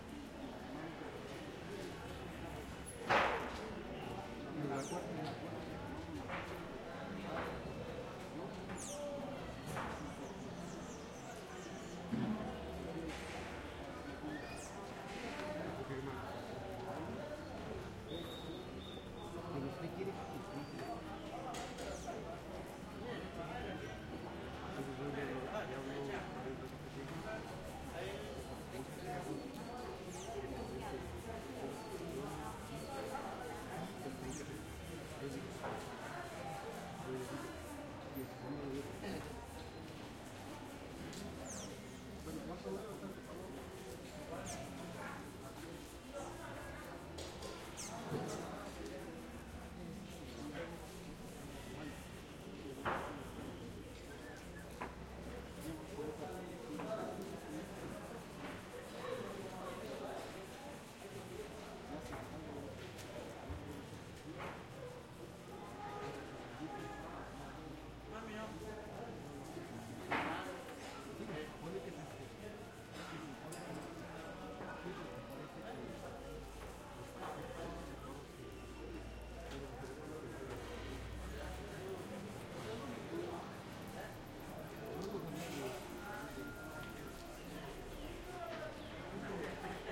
Village center activity
Activity in the center of a small village in the sierra mazateca(Mexico). Voices, daily activity, horns at distance.